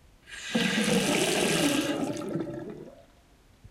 Mono recording of water falling from an opened tap into the sink. See the others in the sample pack for pitch-processed.
slow, strange, pitched, water, sink